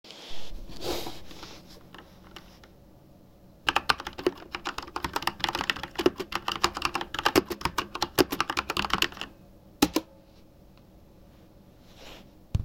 Recorded with my phone (op5t). Used the sound for my own video uploading in case it can be to use for someone out there. Typing speed around 70-80 wpm if I had to guess.
Keyboard is a Corsair K70 (2016 edition).